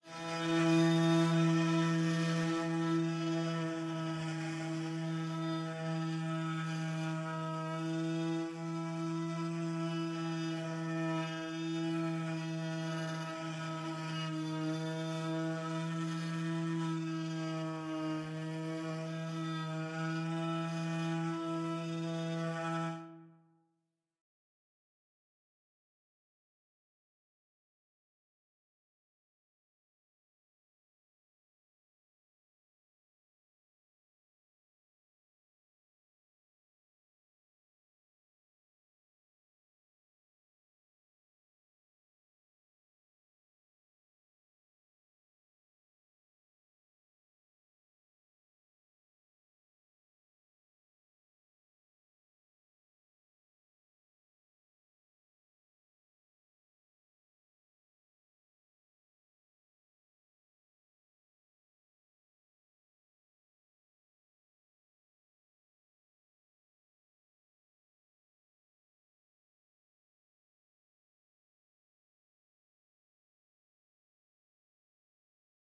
violin E'ish
bogey, terrifying, terror, thrill, background-sound, nightmare, dramatic, anxious, phantom, ghost, sinister, suspense, creepy, spooky, violin, scary, weird, drama